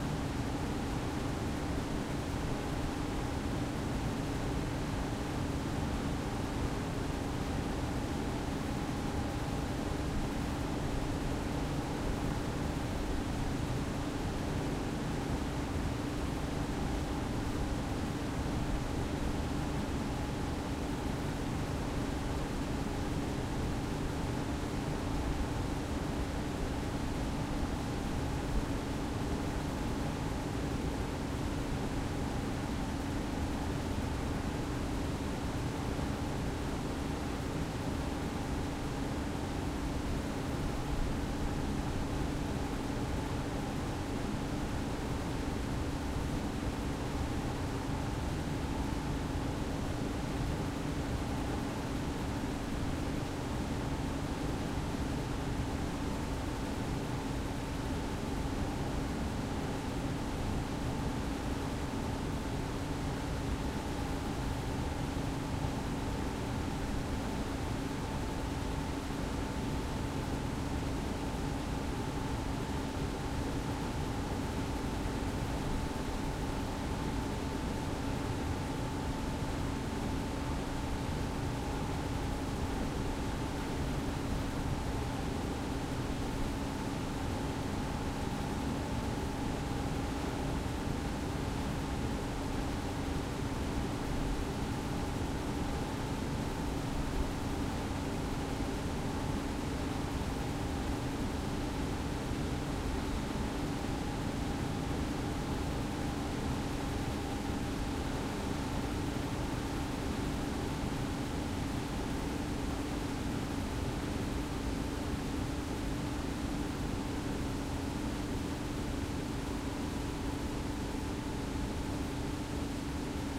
Roomtone, Warehouse, Fans, Vents, Large.